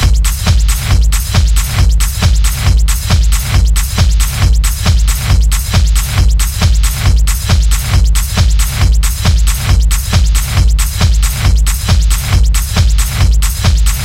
This is my own composition. Made with free samples from the internet, made loops with it, and heavy processing through my mixer and guitaramp, and compressor.
loop, beat, techno, hard